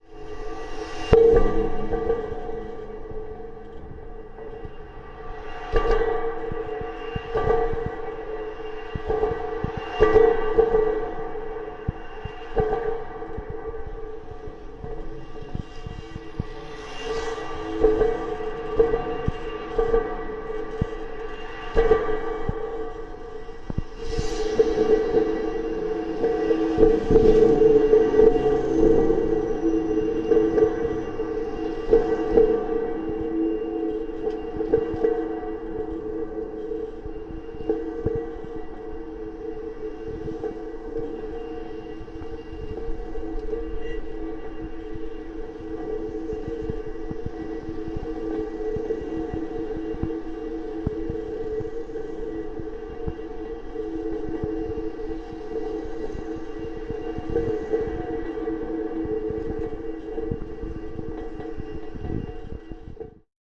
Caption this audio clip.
Contact Mic SDR Bridge Traffic Barrier 03
This is a collection of sounds gathered from the SDR Bridge in Newport, UK.
I had the chance to borrow a contact microphone from a very generous and helpful friend of mine.
Having not used one before, I could not believe the amount of micro sounds it picked up from my finger movements. Movements I couldn't even feel myself make. So I apologise in advance for the light tap every now and again. Still quite interesting to listen to and know where to scout for next time.
contactmicrophone, eerie, strange, newport